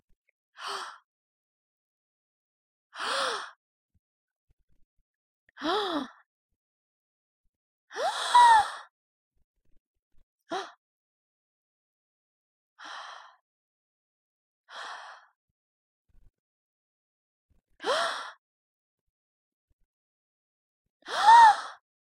Woman's harmonics - gasps
A various series of gasps with woman's harmonics, ranging from surprised to pain.